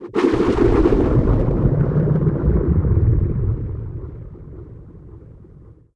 synthetic thru orangator, sortof a mix of ocean waves and thunder, another ambient that I haven't found a use for yet.
rain, watery, sea, ambient, atmospheric, orangator, synthetic, thunder